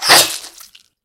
Sword Attack
Sound made with some metal elements and grapefruit.
I want to hear this sound in some of your project! Link it in a comment!
Enjoy!